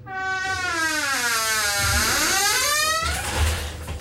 creaking door 2
creak of the door in the office